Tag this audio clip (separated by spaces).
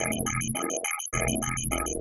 element image loop percussion soundscape synth